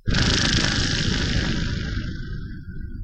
This was made using white noise recorded from my tv set on a process in FL-Studio using multiple vst fx plug-in to give the rattle snake sound
fake-nature-sound, heavy-processe-sound, sound-fx